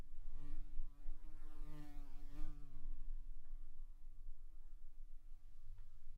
Wasps flying in the studio.
interior, bugs, wasp, flying, buzzing, sting, detail